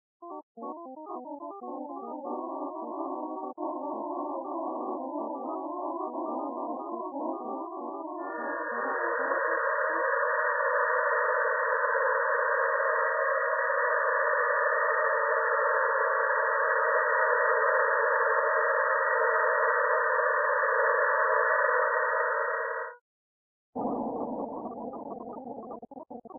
Short, three-field example of stochastic generation of clouds of sound using only sinusoids. Done with blue (of Steven Yi) as an environment for Csound, and pmask (a replacement for cmask done in Python) from inside blue.
pmask, blue, csound, sinusoid, granular, stochastic